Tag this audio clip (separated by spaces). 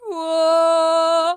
voice femenina dumb voz singing cantando